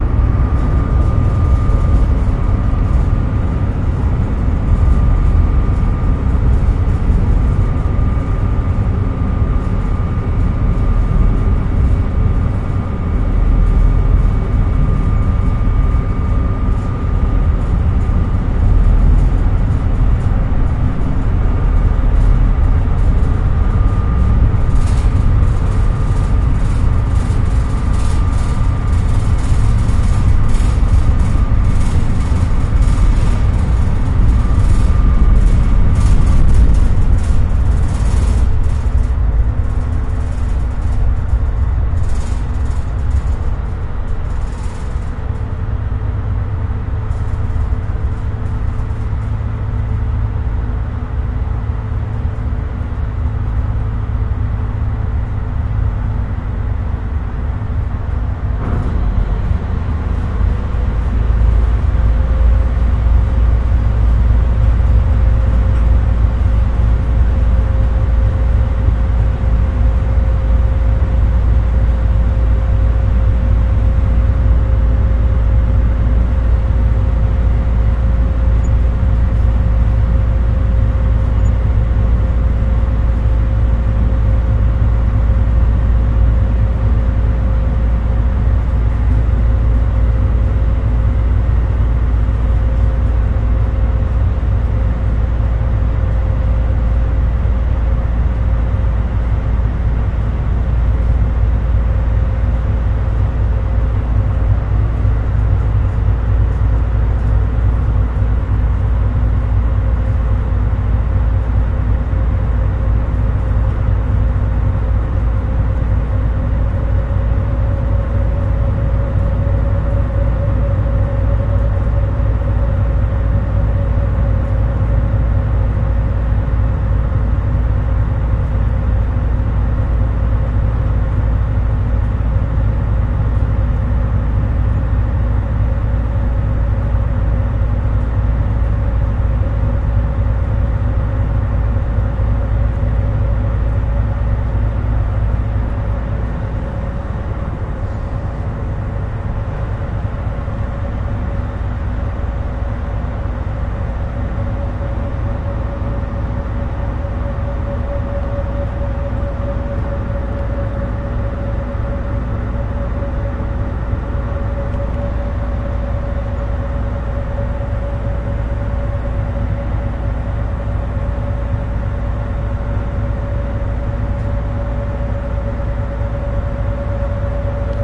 Recorded on Tascam DR_05 on a car vessel sailing to Euboea.